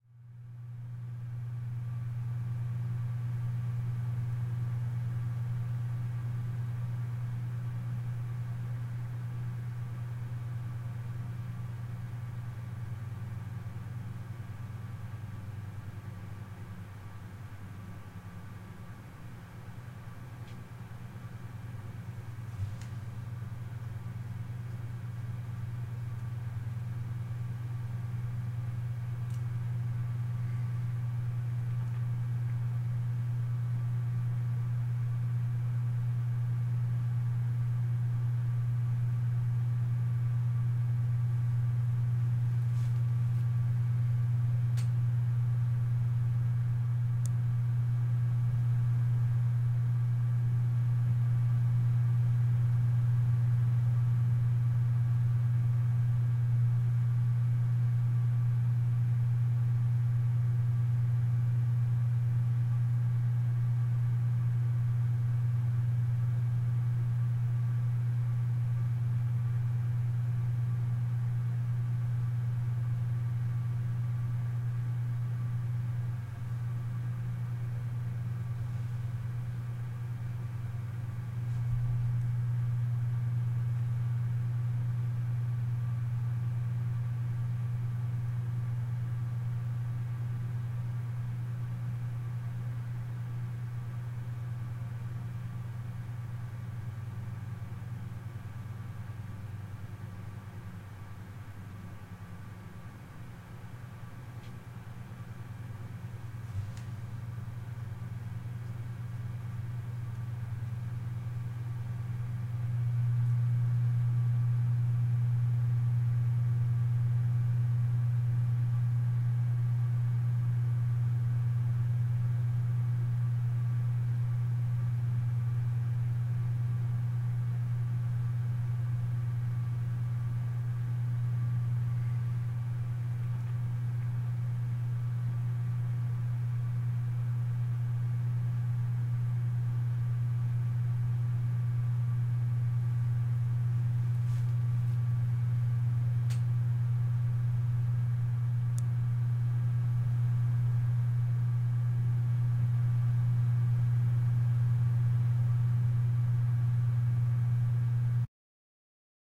short audio file of a ambience inside a large warehouse taken in the early hours of the morning